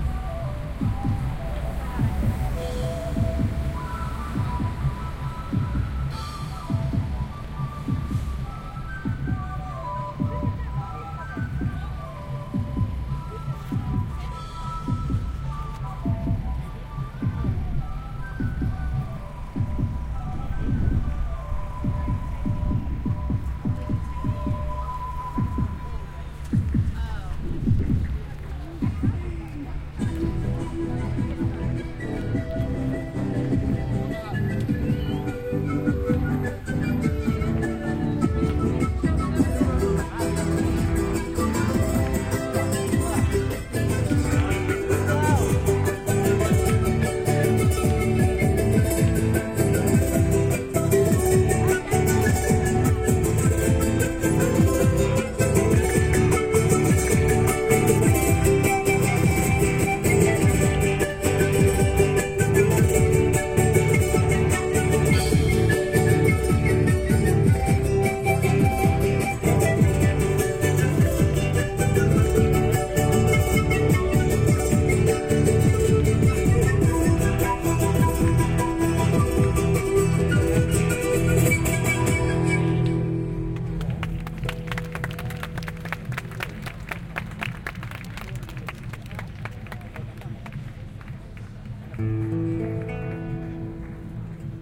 South American musicians playing at The Mound, Edinburgh. Soundman OKM > Sony MD > iRiver H120